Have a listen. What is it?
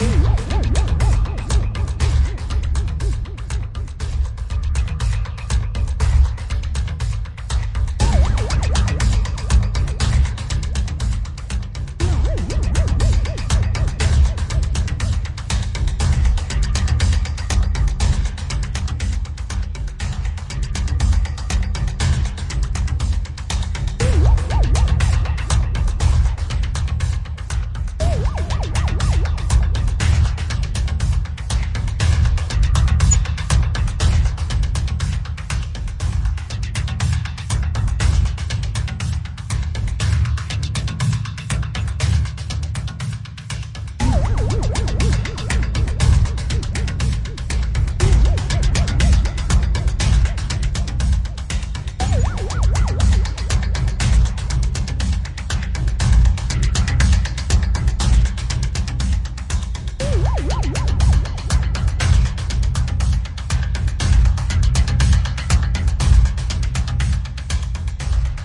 Cop Action - Action Cinematic Music